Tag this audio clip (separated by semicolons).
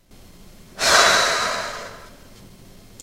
breath; breathe; female; girl; human; reaction; sigh; speech; vocal; voice; woman